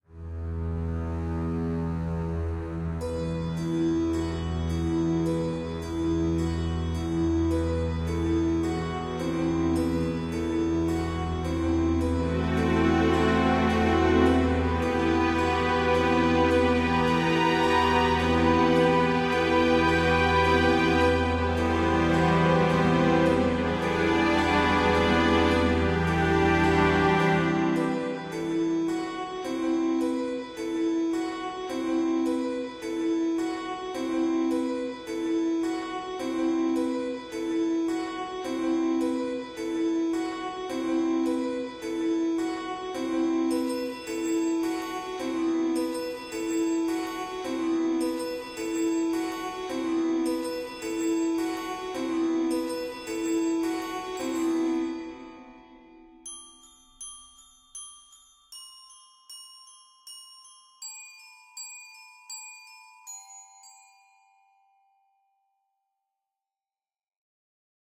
A short sad horror music track.